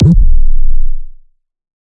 Dragged sample 1007
Weird, sample, kick